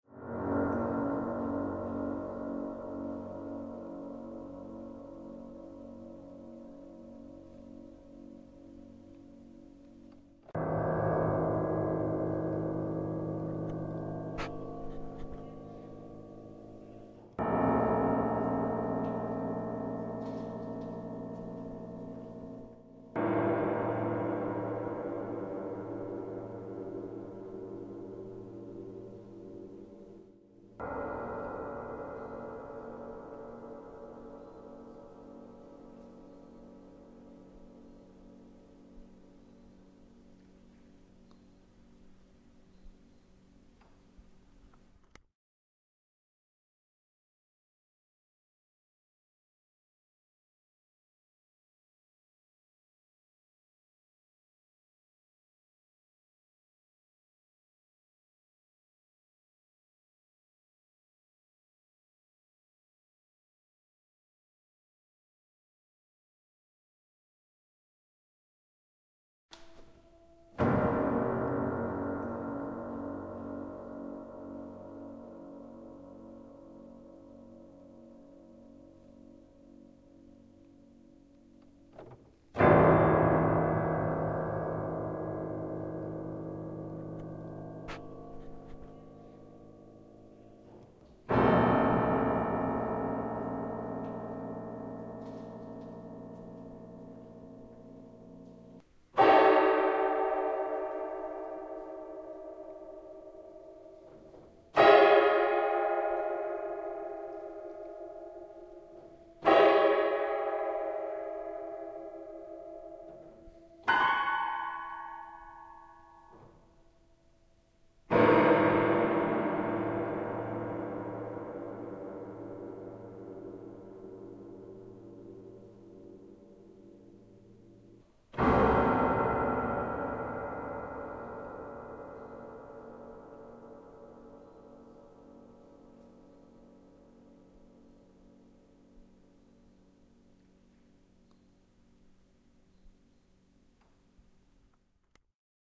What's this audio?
Old piano out of tune. In the house inhabitated Galatzó (Mallorca). The house were lives -people said- the gost of the Comte Mal.
music, piano